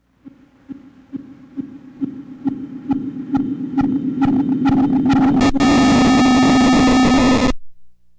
Static Build and Scream Intense 2
Weird static build I made on Korg EMX, noise reduced on Audacity